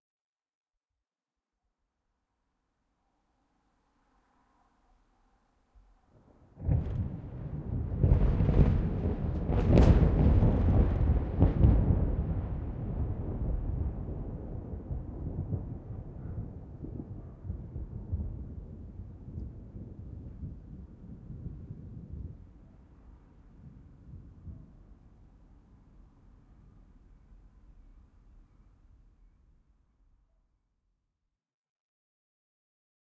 Scary Thunder
Particularly scary sounding roll of thunder I captured ages ago.
outdoors, ambient